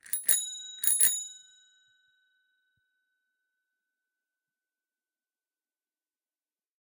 Bike bell 10
Bicycle bell recorded with an Oktava MK 012-01